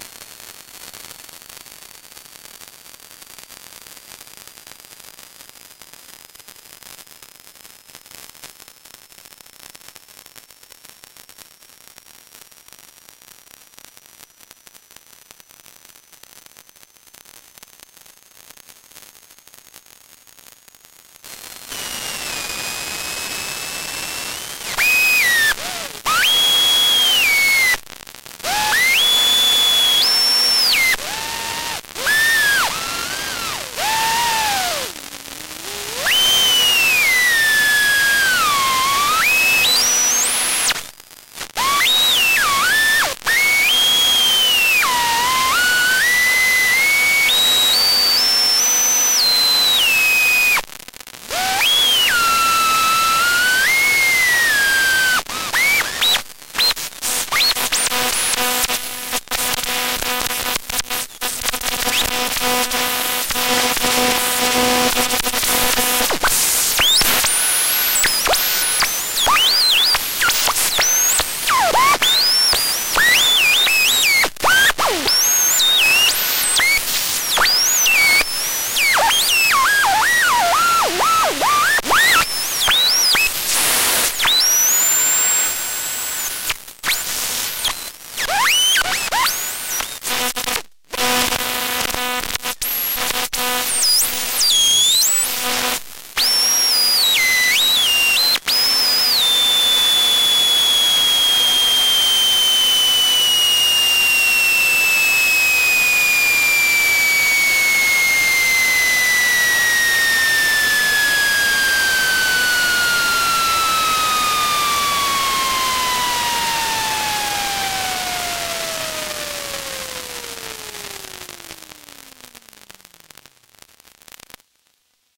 A cheesy AM/FM/TV/CB/WEATHERBAND radio plugged into the dreadful microphone jack on the laptop out on the patio.